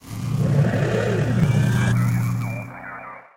Made from parabolix's robot_factory. I needed it to be shorter and monaural.